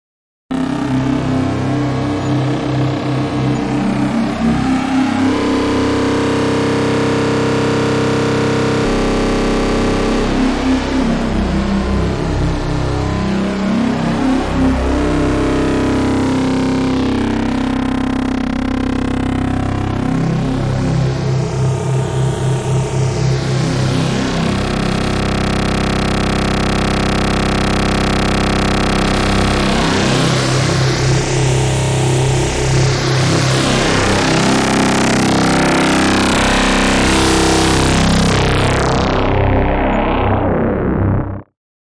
Face Smelting Bass Squelchers 4
bass, distorted, hard, heavy, rip, shred, squelch